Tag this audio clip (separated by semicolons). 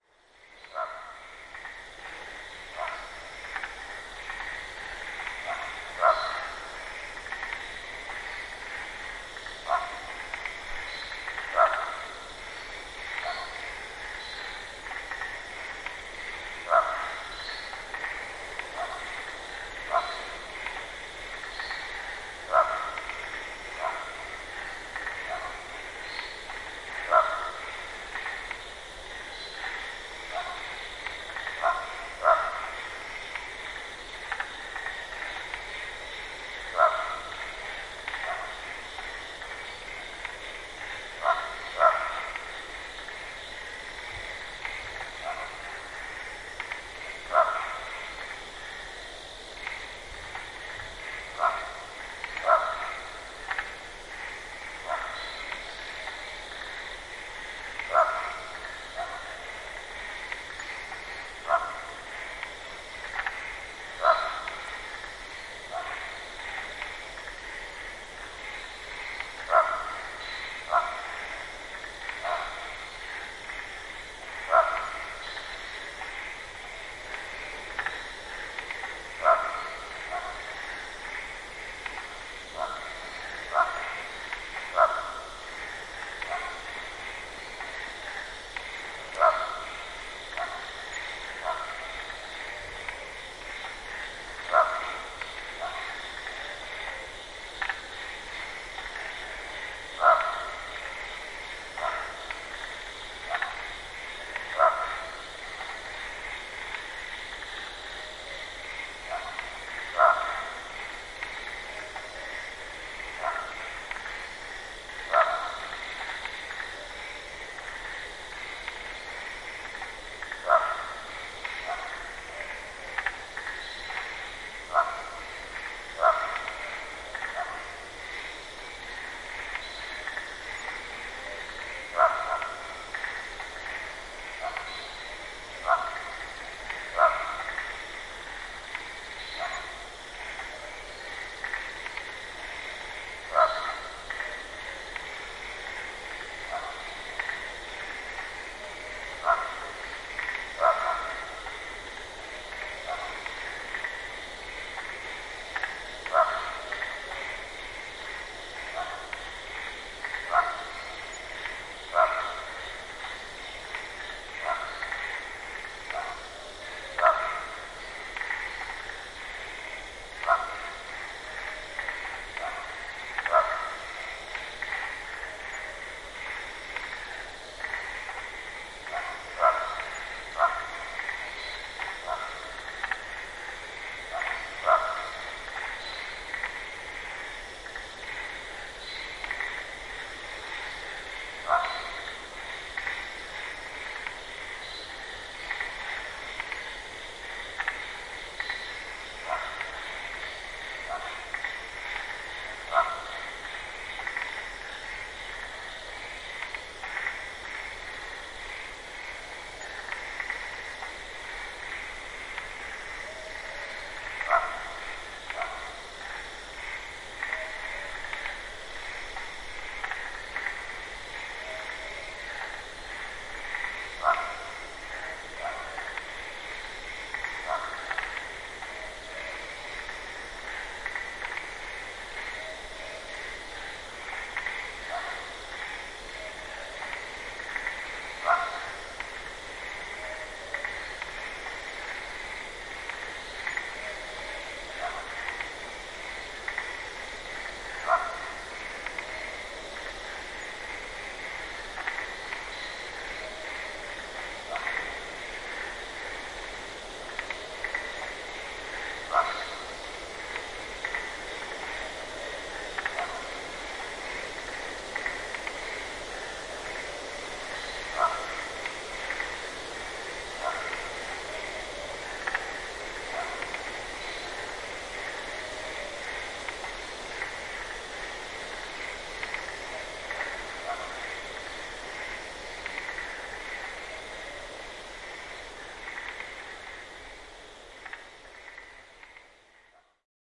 ambiance; ambience; ambient; atmo; atmos; atmosphere; background; barking-marsh-frog; crickets; field-recording; frog; frogs; insects; limnodynastes-fletcheri; mopoke; nature; night